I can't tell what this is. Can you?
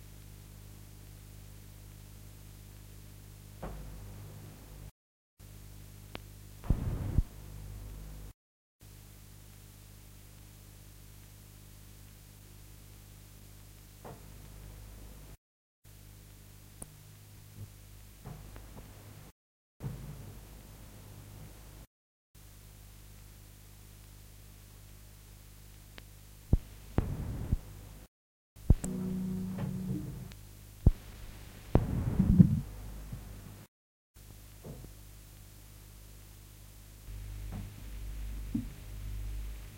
Hiss and noise and rumble of analogue tape. Sounds between studio records. Clicks of start records on tape recorder and occasional parts of previous records.
Eight different parts.
Recorded approx 1990